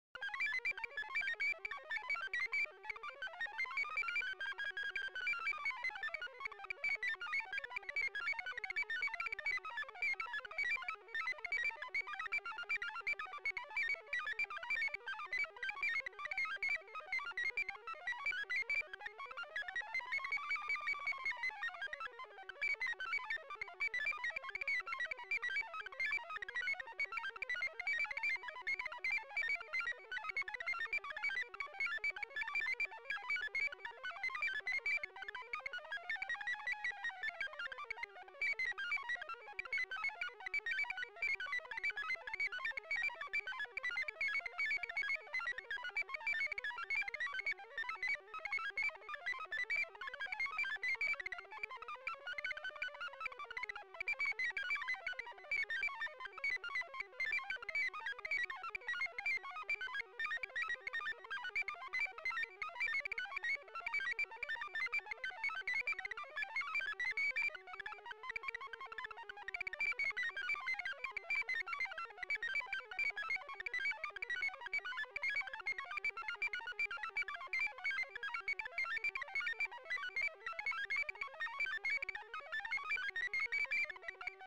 Computer sounds made by me with synthesizer. Look for more my computer sounds.
trek, sounds, computer, star